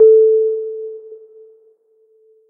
215415 unfa ping modified
Sonar ping sound made by unfa.